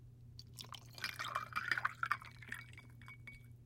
Pour3 - PERFECT COCKTAIL
These are various subtle drink mixing sounds including bottle clinking, swirling a drink, pouring a drink into a whiskey glass, ice cubes dropping into a glass. AT MKE 600 into a Zoom H6n. No edits, EQ, compression etc. There is some low-mid industrial noise somewhere around 300hz. Purists might want to high-pass that out.
alcohol, bar, cocktail, drink, glass, ice, ice-cube, pour